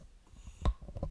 Slide and tap
metal, fabric, slide, cloth, object, hiss, swish